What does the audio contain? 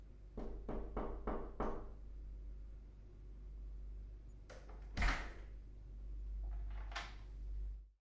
Knock on office door
Knocking on an office door. The rude bugger inside the office doesn't have the common courtesy to answer, so the knocker enters anyway oping to steal some instant noodles. Just kidding, it's just a knock.